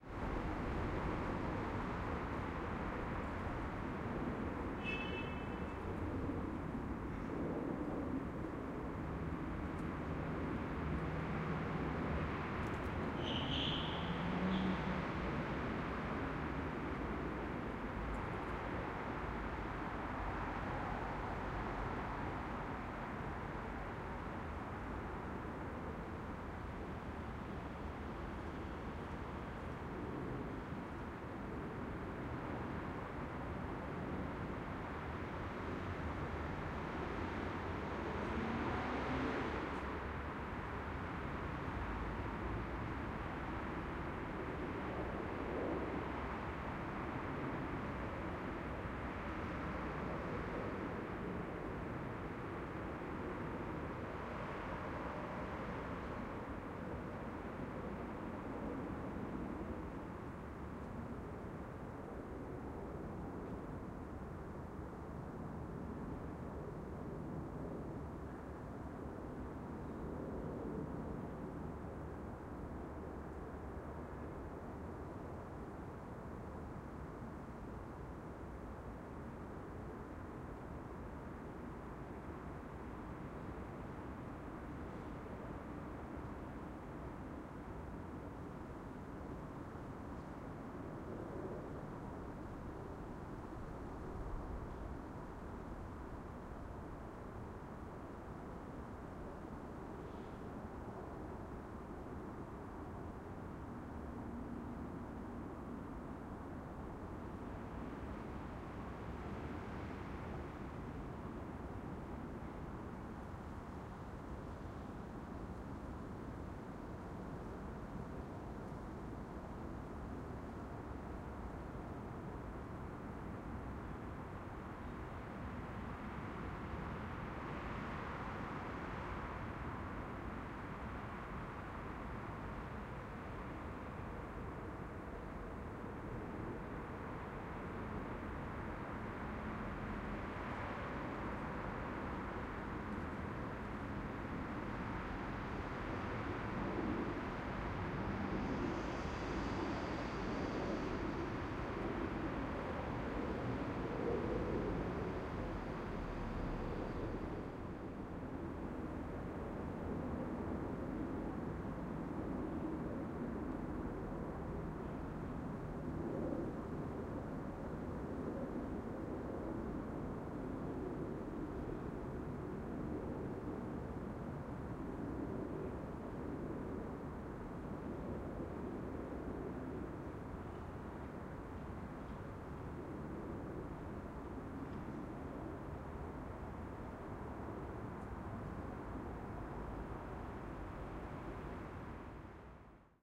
Cologne at Night, General Ambience (Surround)
General Ambiance of Cologne at Night at a quiet parking lot. Distant traffic noise and planes passing overhead. only small noises from nearby.
Recorded with a spaced array of 2 KM184 (front) and 2 KM185 (surround) into a Zoom H6.
Allgemeines-Rauschen, Ambience, Atmo, City, Cologne, Distant-Traffic, Field-Recording, Flugzeuge, General-Ambience, K, ln, Nacht, Night, Planes, Stadt, Urban, Verkehr